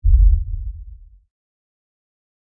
Recorded with Zoom h2n.
Processed with Reaper
From series of processed samples recorded in kitchen.